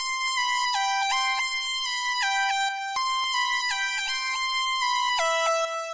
Lead Synth 1
Lead synth used in Anthem 2007 by my band WaveSounds.
hard, high, melodic, synth